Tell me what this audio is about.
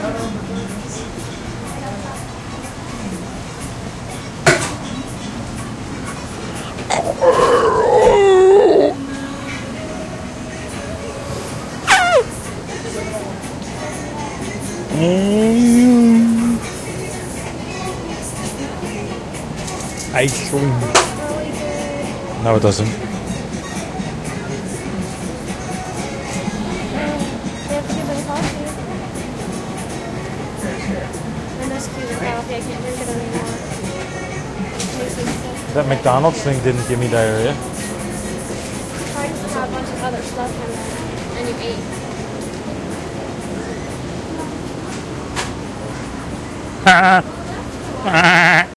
zoo people silly
Walking through the Miami Metro Zoo with Olympus DS-40 and Sony ECMDS70P. More silly people.
animals, field-recording, zoo